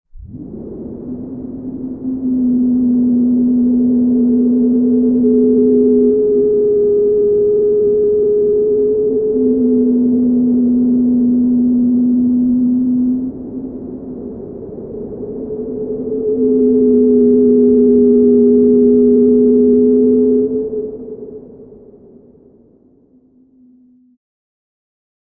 ANALOG DRONE 6
A drone sound i created using a DSI Mopho, recorded in Logic.
ambient, Analog, drone, evolving, Mopho, soundscape